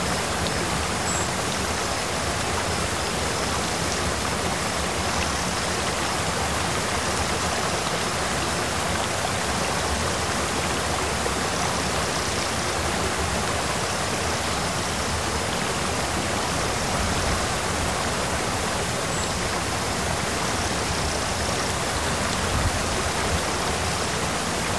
Flowing water very close to the river
Sound of water flowing in the river.
agua, flow, fluir, naturaleza, rio